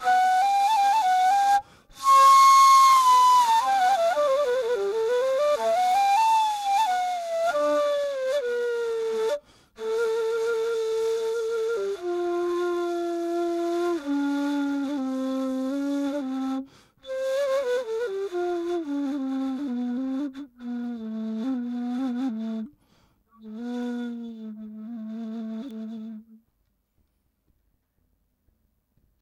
Ney Melody 09
arab, loop, ney, oriental, oud, sample, song, sound, trke